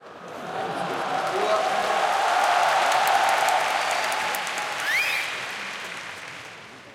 181106 crowd cheer applaud hall
Crowd Cheer Applaud with laugh in a very big hall
Applaud, Cheer, Crowd